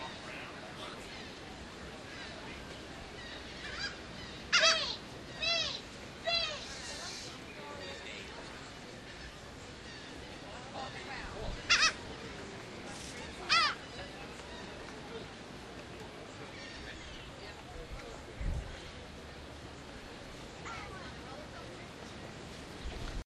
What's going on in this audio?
newjersey AC south carolina ave
Pavilion by South Carolina Avenue on Atlantic City Boardwalk recorded with DS-40 and edited in Wavosaur.
boardwalk, seagull